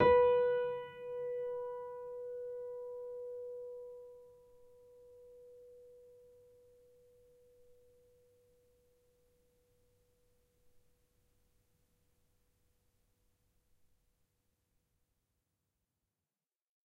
upright choiseul piano multisample recorded using zoom H4n
piano, multisample, choiseul, upright